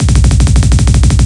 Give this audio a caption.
A simple Trancy Drumroll, use with the other in my "Misc Beat Pack" in order from one to eleven to create a speeding up drumroll for intros.